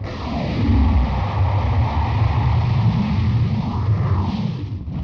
FTZ GC 121 SSFullTurbo
Sounds of bigger and smaller spaceships and other sounds very common in airless Space.
How I made them:
Rubbing different things on different surfaces in front of 2 x AKG S1000, then processing them with the free Kjearhus plugins and some guitaramp simulators.
Phaser, Warp